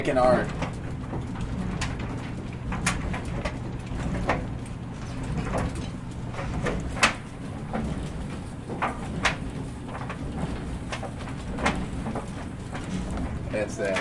Ben'sWasher
The sounds of a washer